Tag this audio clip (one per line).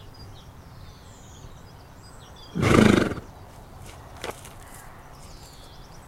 horse
field-recording
animal
snort